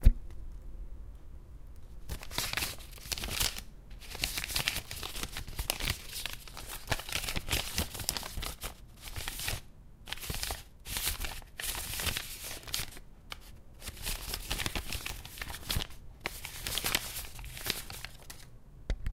This is a Shulffing paper and book. Foley Sound. Have fun filming!
Tech Info:
Zoom H1
Lowcut Off